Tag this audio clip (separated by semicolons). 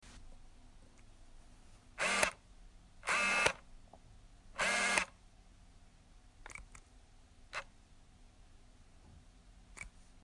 Camera; Focus; Lens; Sound